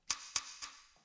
tenazas abriendo y cerrando
close, opening, tenzas, open